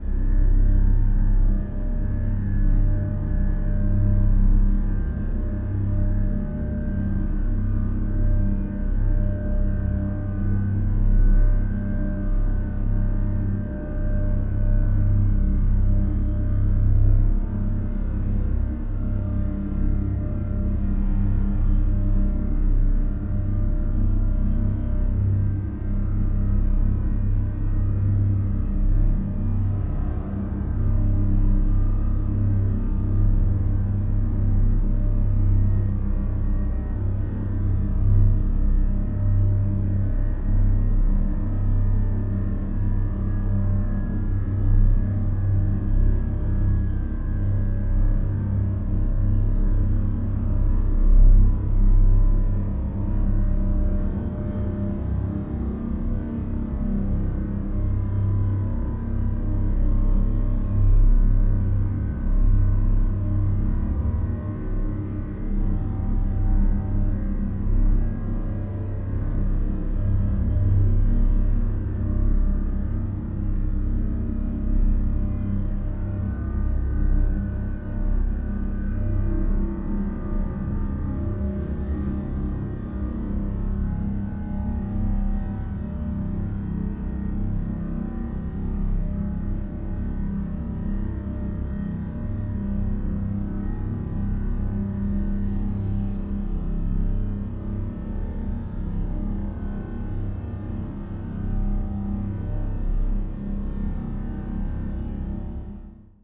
04 bus + ir1
Dark and creepy sound design. third step of process of the bus sample on Ableton. Added waves IR-1 (reverb) on the previous sample to make it less digital.